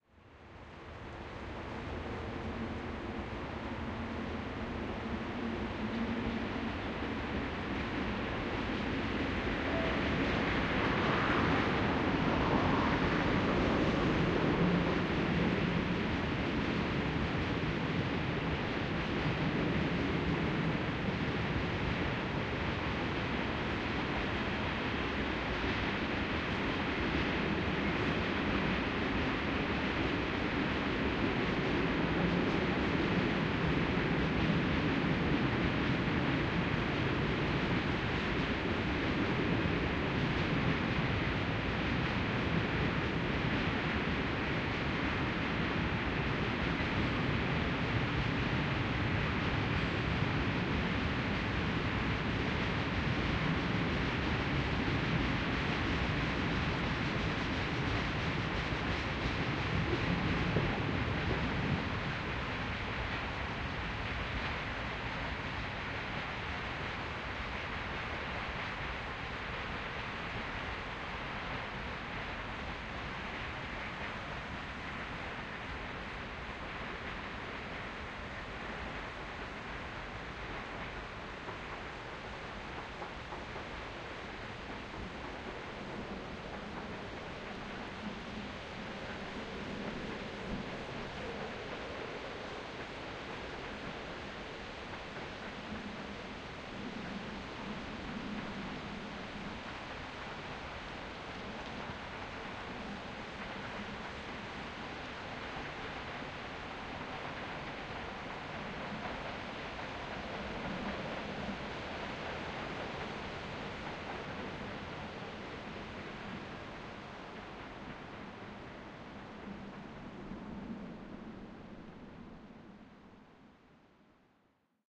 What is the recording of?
hi-fi szczepin 01092013 swoosh of passing by train zaganska street
01.09.2013: fieldrecording made during Hi-fi Szczepin. performative sound workshop which I conducted for Contemporary Museum in Wroclaw (Poland). Sound of passing by train near of Zaganska street in Szczepin district. Recording made by one of workshop participant.
marantz pdm661mkII + shure vp88
Szczepin, train, field-recording, Poland, Wroclaw, noise